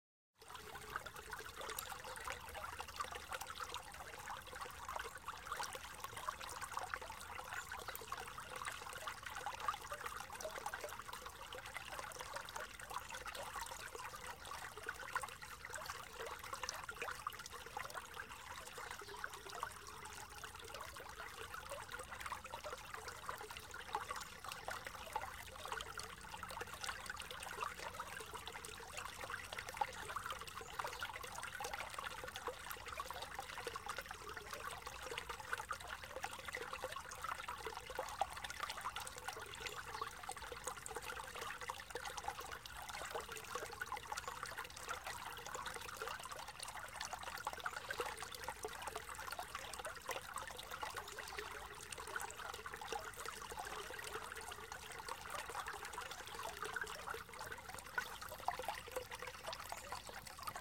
Podgradje brook
Brook: sound of flowing water, in background bird and grasshopper. Field recording with Samsung Galaxy 6. Unprocessed.
This [video/theatre piece/...]
babbling, brook, creek, flowing, water